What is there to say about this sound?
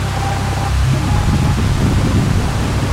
lo hum under burbles
Casio CA110 circuit bent and fed into mic input on Mac. Trimmed with Audacity. No effects.